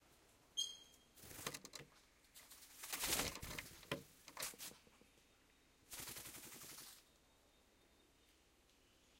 Riflebird Flapping 3
Riflebirds eat grapes placed on the table on which the microphones were sitting. Fly in and fly out. Audio Technica AT3032 stereo microphone pair - Sound Devices MixPre - Edirol R09HR digital recorder.